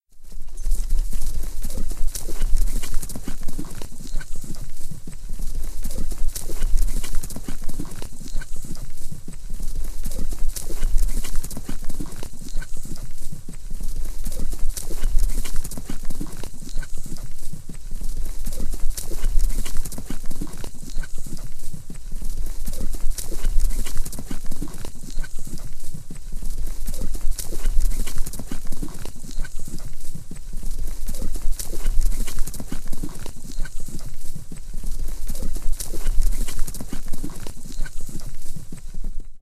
Maxheadroom's Galloping Horse (39 sec. loop)
A 39 sec. loop of Maxheadroom's wonderful "field-recording of a real live horse galloping on hard dry ground with some heavy snorting. Not a gallop past, but a constant gallop around the mic." Thanks Max! I needed it to be longer for our podcast, so I figured I'd share this. I will warn you, it's pretty obviously looped due to the horse's breathing being repetitive. I was working with a 4-5 second clip.
If you like it, please give me a rating!
field-recording, hooves, running